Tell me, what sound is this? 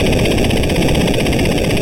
Machine gun
The noise version of the "machine vibration". It can sound like a turret or another machine-run projectile shooter. Loopable
arcade, loop, machine, retro, shooting